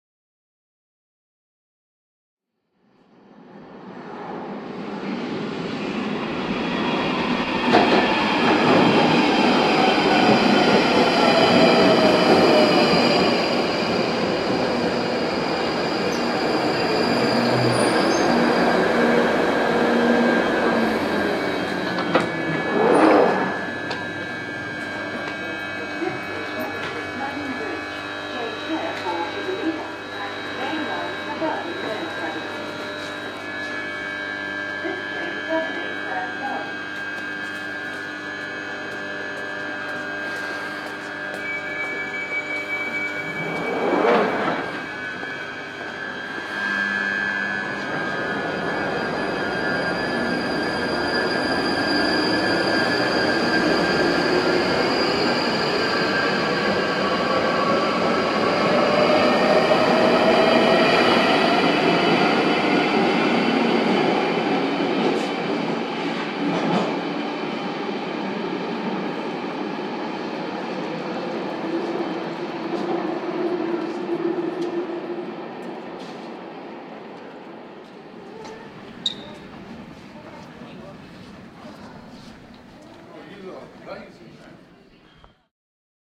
London Underground: train arriving at station, then leaving
Recorded on Marantz PMD661 with Rode NTG-2.
A london Underground train pulling into London Bridge station followed by the doors opening, then closing and finally, the train pulling out of the station.
Platform recording.
arrival, arrive, arriving, departure, Doors, field-recording, leave, leaving, London, London-Underground, people, platform, station, Subway, Train, Travel, tube, Underground